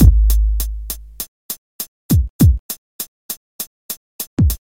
Drumloop Jungle or not to jungle - 2 bar - 100 BPM (swing)
Jungle thought without "the thing", for a break or something
Made with Hammerhead Rythm Station
drum-loop, break